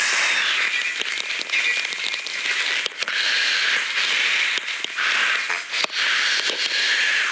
breathing in the microphone, with massive amounts of compression, gating, equalizing etc
voice, industrial